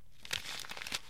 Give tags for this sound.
paper; folding; origami; fold

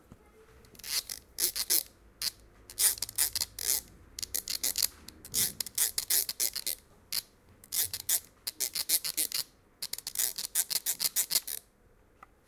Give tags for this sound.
Can; Cladellas; mySounds; object6; Spain

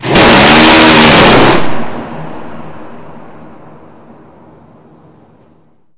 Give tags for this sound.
anti-air; antiair; army; autocannon; burst; CIWS; engine; fast; fire; firing; Gatling; gun; military; Phalanx; rate; rate-of-fire; shooting; shot; Vulcan; weapon; zap; zoom

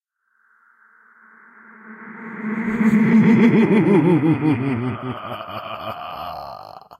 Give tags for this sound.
echo; evil; laugh; reverse; sinister

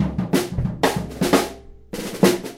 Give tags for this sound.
2nd; beat; drum; floor; line; second; snare; tom

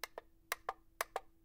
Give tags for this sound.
button; foley; push; small; toy

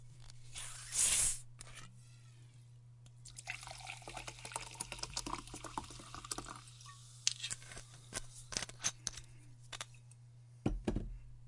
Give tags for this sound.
beer; bottle; cap; drink; filling; fizz; glass; open; pour; soda

